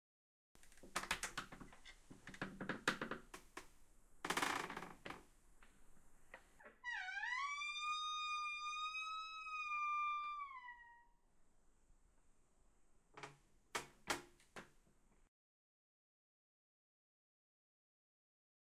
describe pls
Open Door slowly squeak